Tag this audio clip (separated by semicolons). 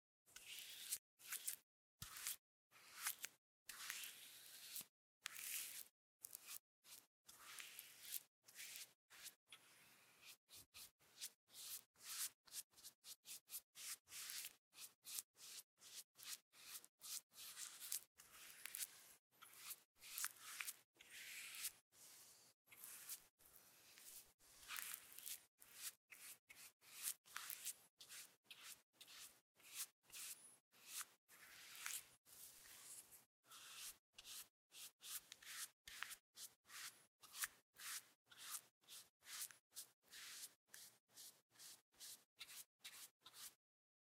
art; bross; brush; brushed; Brushstrokes; Coups; de; enduire; paint; painting; peinture; pinceau